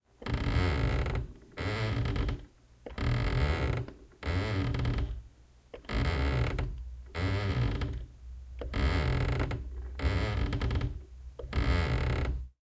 Creaking floor
My wooden floor in action. Creaking wood, squeaking floor.
squeaking, creaking, wood, floor, old